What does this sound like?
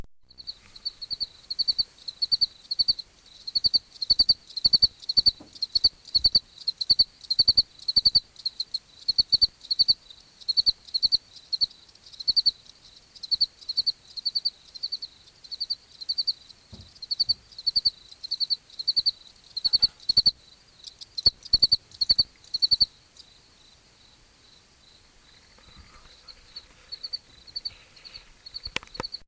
a nice loud cricket, solo.